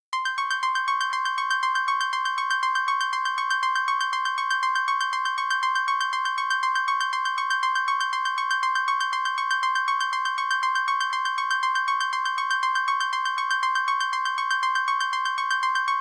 Horror style string sound
horror, string